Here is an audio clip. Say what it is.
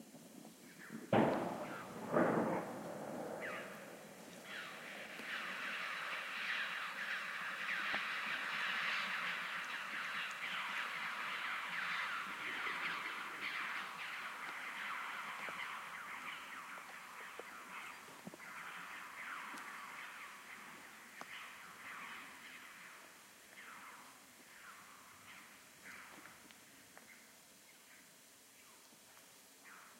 20170217 06.gunshot.echo.choughs
Noise of gunshot, followed by echo and callings from a group of Red-billed Chough (Pyrrhocorax pyrrhocorax). Recorded at the mountains of Sierra de Grazalema (S Spain) with Primo EM172 capsules inside widscreens, FEL Microphone Amplifier BMA2, PCM-M10 recorder.